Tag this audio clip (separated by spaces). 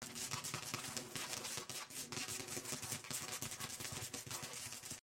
dead-season door foley horror monster scratch zombie